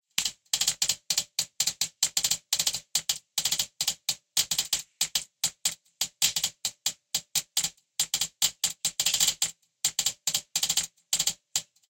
Clicks (Technology)
The clicks originated from a machine at a local grocery store where a device checks the temperature and humidity of fruits and vegetables, thought it was a unique sound that can depict computers crunching numbers.
Recorded with Samsung Galaxy Edge 7 and edited with Cakewalk by Bandlab